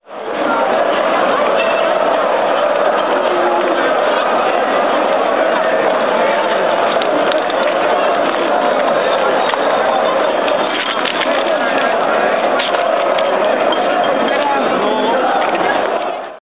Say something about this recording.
23.13 kolejorz celebration4 150510
accidental documentation of spontaneous celebration of fans of the Poznan football team Lech-Kolejorz which won the Polish championship. Recordings are made by my friend from England Paul Vickers (he has used his camera) who was in the center of Poznan because of so called Annual Museums Night. It was on 15.05.2010. The celebration has placed on Old Market in Poznanń. In this recording we can hear the Lech-Kolejorz bugle call that started the celebration and by the way the devastation of the center of Poznan.
crowd, field-recording, poznan, poland, football, celebraton, football-team, fans, lech-kolejorz, noise, championship